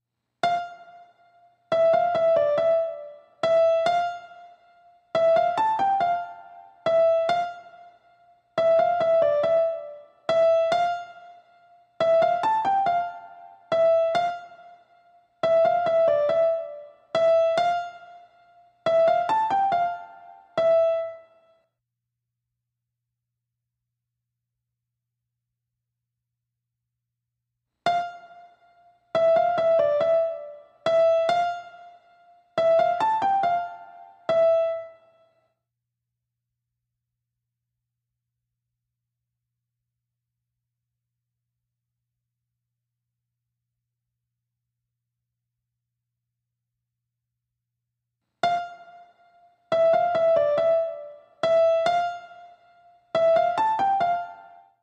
A piano motif in FMaj; at 140BPM.